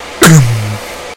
it doesn't actually sound like a bongo drum but thats just what everyone calls it